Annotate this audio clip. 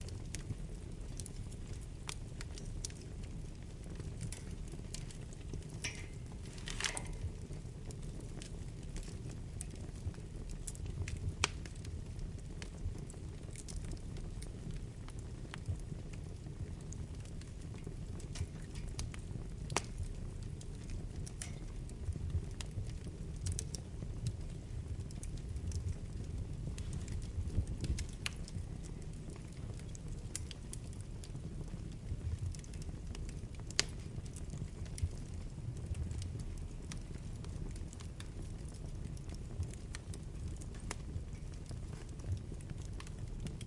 Fireplace (heavy)
Recorded with a hyperdirectional SSH6 capsule - only the shotgun mic open - (via the ZOOM H6) the fireplace's sound has an impressive presence.
burning, fire, fireplace, wood